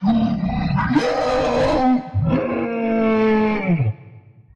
Monster Howl
Recorded myself doing some silly monster sounds in FL studio, synthesized it in Harmor, and did some post-processing. The result, a pretty convincing werewolf-ish creature howl.